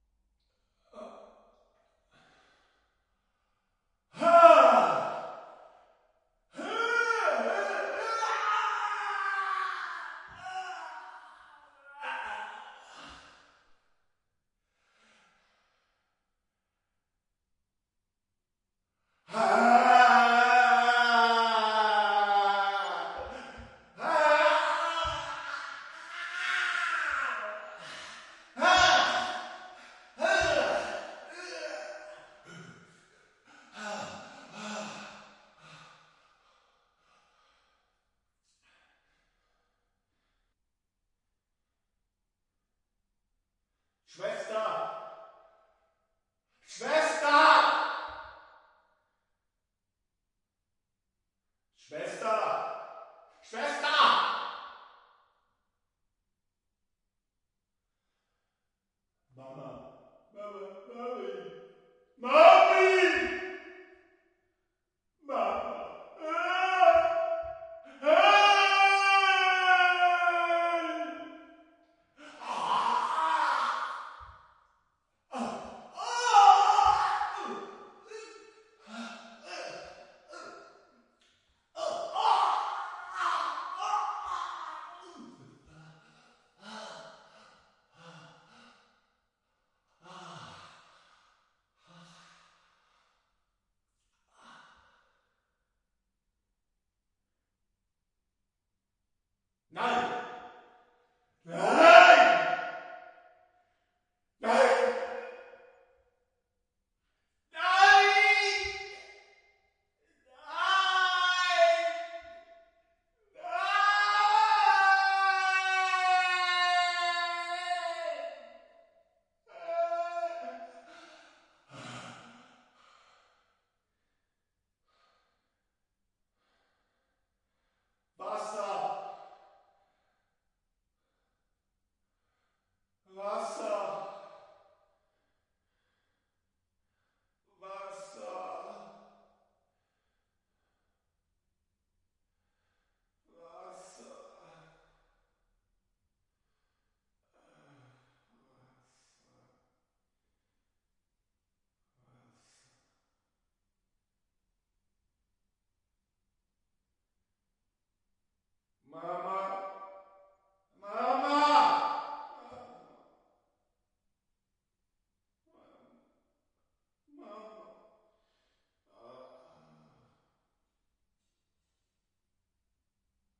Needed different sounds of men crying in pain. So recorded a set of different noises, grunts and crys. Made some fast mixes - but you can take all the originals and do your own creative combination. But for the stressed and lazy ones - you can use the fast mixes :-) I just cleaned them up. Si hopefully you find the right little drama of pain for your project here.